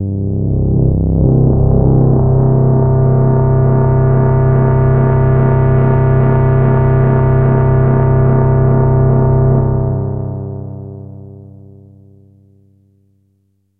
Super FM Pad C1
An evolving pad type sound created on a Nord Modular synth using FM synthesis and strange envelope shapes. Each file ends in the note name so that it is easy to load into your favorite sampler.